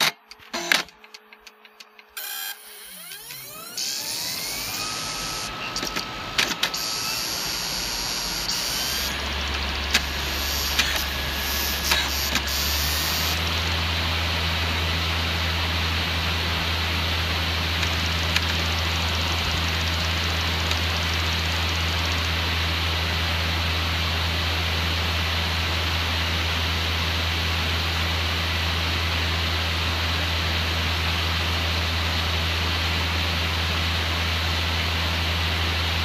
Cd rom reading cd
This is a sound of cd rom turning. Recorded with an iPhone SE and edited with GoldWave.
cd, read